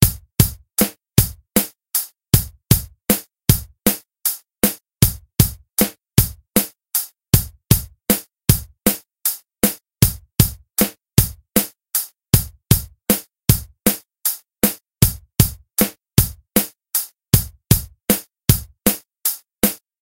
13-8 beat b extended
A drum pattern in 13/8 time. Decided to make an entire pack up.
full, drum, 13-08, 13, 13-8